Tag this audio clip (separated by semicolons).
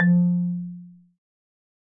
instrument; marimba; percussion; wood